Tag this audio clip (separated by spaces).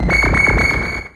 multisample one-shot